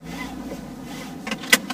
Sound of a seet belt being buckled. Inside prospective. This sound has been recorded with an iPhone 4s and edited with gold wave.
seet, belt, seet-belt